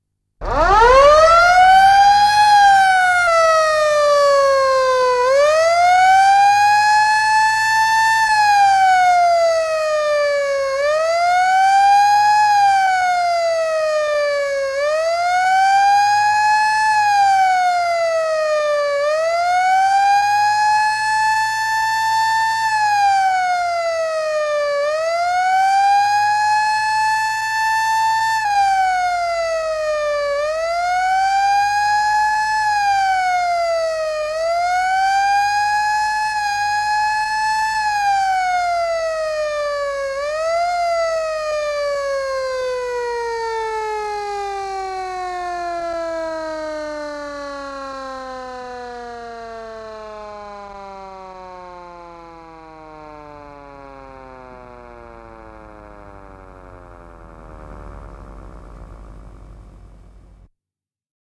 Paloauto, vanha, sireeni, hälytysajoneuvo / Emergency vehicle, old fire engine, stationary siren, wail, long fade
Hälytyssireeni paikallaan, ulvova (wail). Pitkä sammuminen.
Paikka/Place: Suomi / Finland / Helsinki
Aika/Date: 09.02.1957
Emergency-vehicle, Finland, Yle, Siren, Soundfx, Paloauto, Suomi, Finnish-Broadcasting-Company, Field-Recording, Sireeni, Tehosteet, Yleisradio, Fire-engine